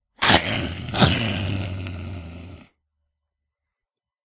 Me growling angrily into my mic to immitate a monster.
monster, horror, creature, beasts, growl